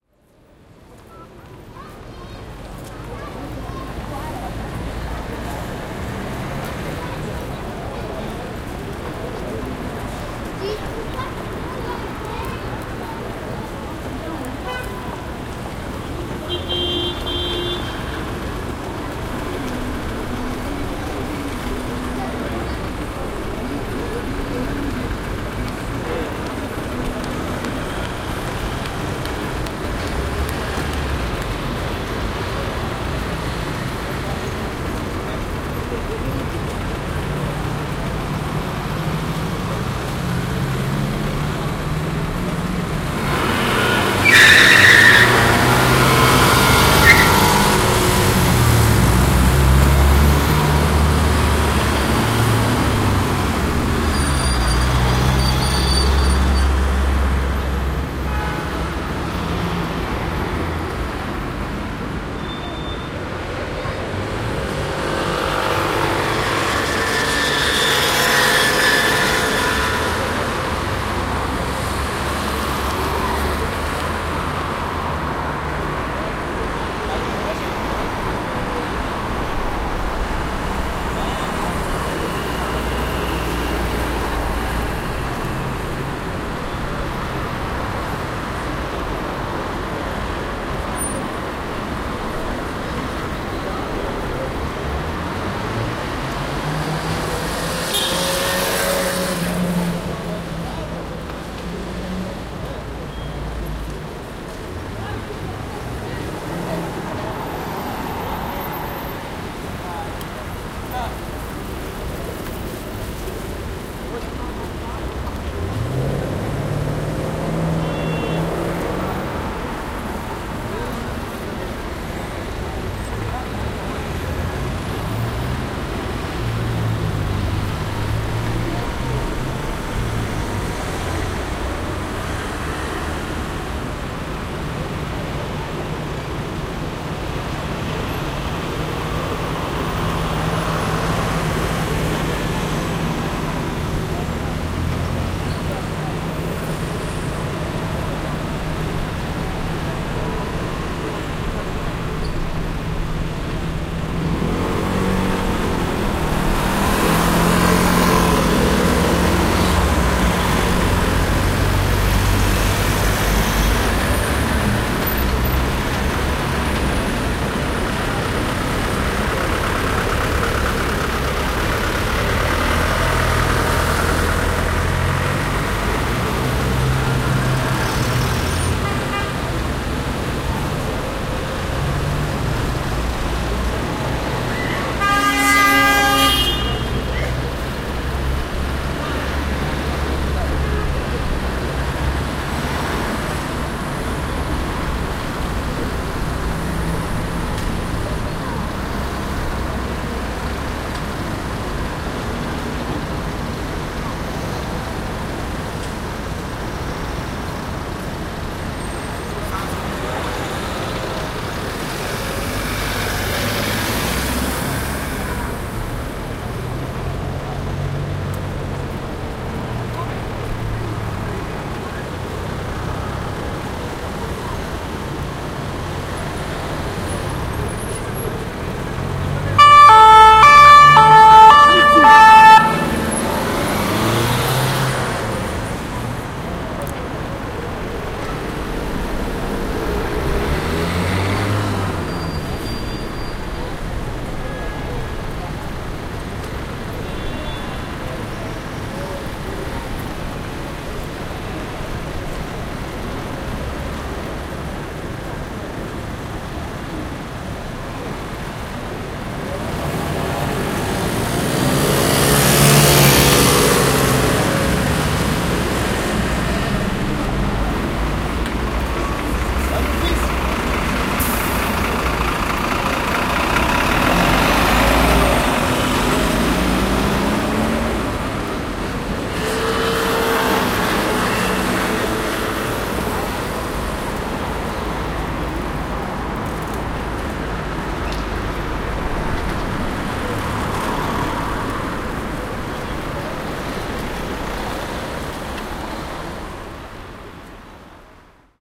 A busy street in the outskirts of Paris. Cars, passers-by, street atmosphere. Recorded with a zoom h2n.